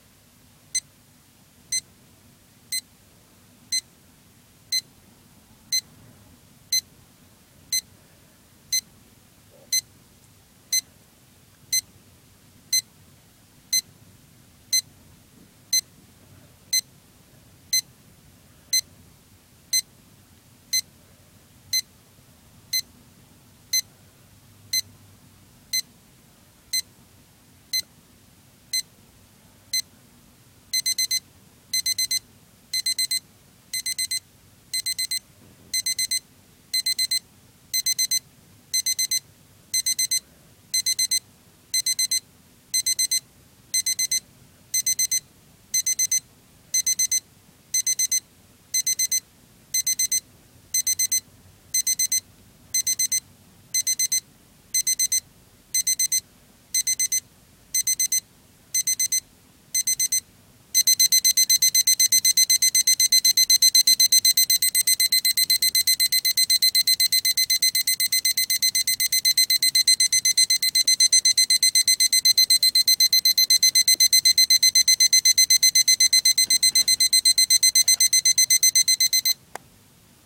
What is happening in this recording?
My radio controlled projection alarm clock beeping loudly to wake you up! Starts off slow and then speeds up until I turn it off. Noise-reduced version also available.
alarm, alarm-clock, alert, beep, beeping, beeps, bleep, bleeping, bleeps, buzzer, clock, electronic, morning, wake, wake-up, warning